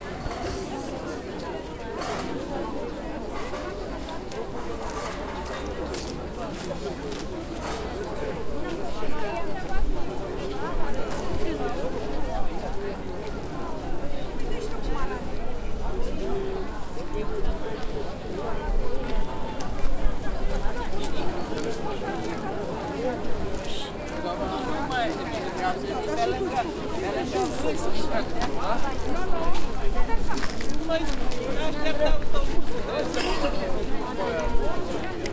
Urban ambience with people talking
Urban ambiance with people talking each other recorded with Tascam DR 40x
background-sound, street, ambience, people, soundscape, noise, atmosphere, field-recording, traffic, city, ambiance, ambient, talking, general-noise, urban